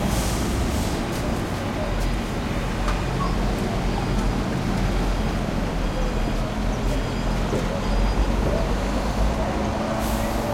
garbage dump haze machines short Gaza 2016
dump, garbage